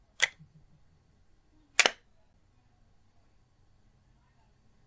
opening and closing plastic container
the sound of a plastic box being opened and shut
click, box, open, container, opening